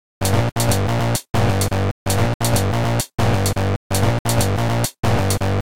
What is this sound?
An acid loop made with HardPCM's AcidBase047 sample.